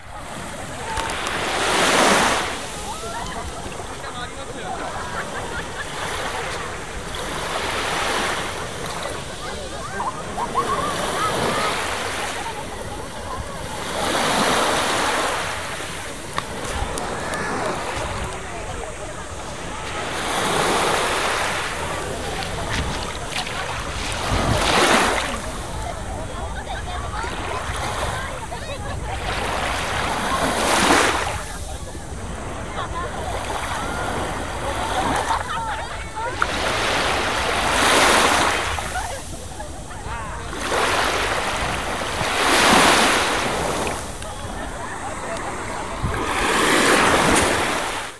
vacation beach1
beach, coast, field-recording, japan, japanese, ocean, sea, seaside, shore, summer, surf, vacation, water, wave, waves